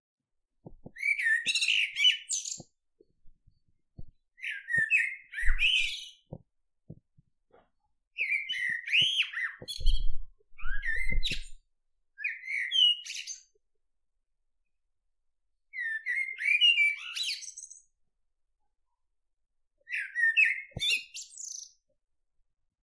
An other blackbird singing in my garden. Fieldrecording with Zoom H5 post prosessed with Audacity. Enjoy!